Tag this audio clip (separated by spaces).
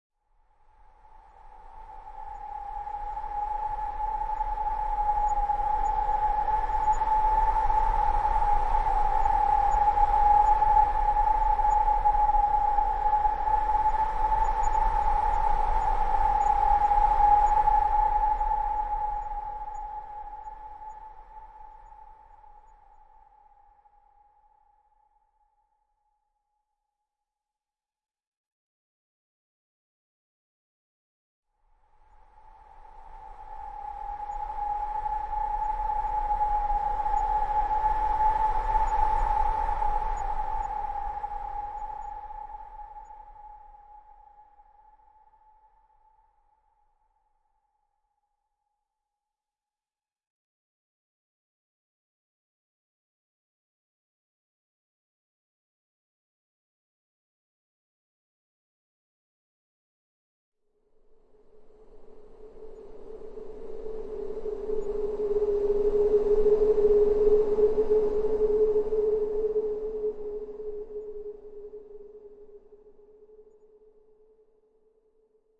anxious atmos background-sound build drama dramatic haunted nightmare phantom sinister spooky suspense terrifying terror thrill weird wind